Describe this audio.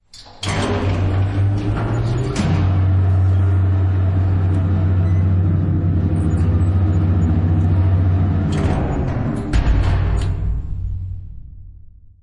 0 Lift FULL
A scary, mecanical, big elevator I created with multiples plugins and layers of my lift recordings.
A combination of START + ON + STOP.
cranck, lift, metal, mecanic, elevator, creak, rattle, door, old